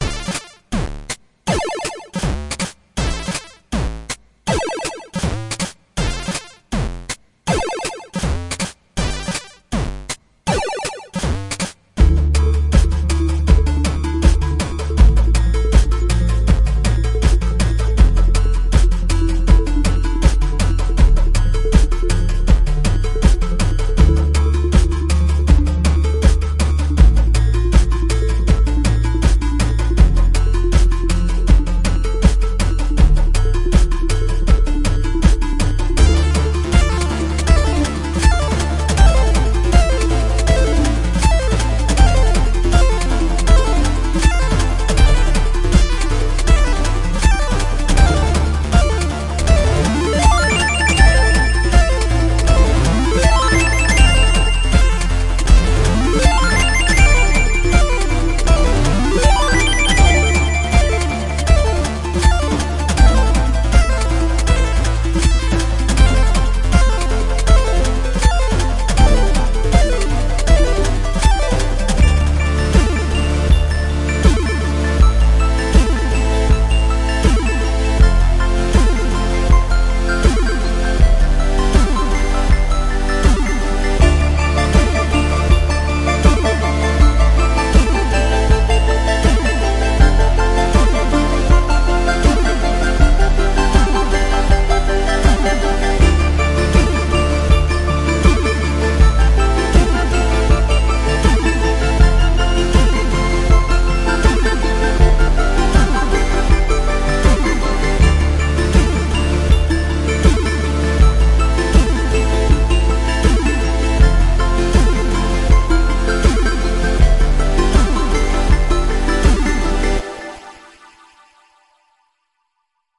Korg M3 + Arturia Minibrute + Teenage Engineering PO-20 Arcade
80 BPM
Dm -> Am -> F -> G